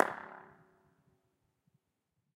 Tunnel 3 Impulse-Response hight-pitch flutter echo
Tunnel 3 Impulse-Response flutter echo
flutter, Impulse-Response, reverb, Tunnel, echo, 3